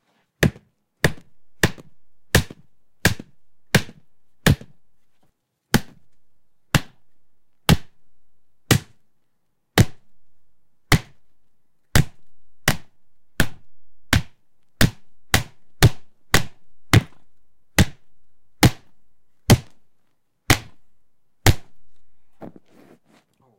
Melon beating
This is the sound of me repeatedly beating a nearly-ripe honeydew melon with a light wooden bat about as hard as I could. It works great for punches, especially with a little reverb and crunch added.
Foley used as sound effects for my audio drama, The Saga of the European King.
Recorded at Spiral Wave Radio with a a forgotten microphone in a padded studio space.
drum, melon, punch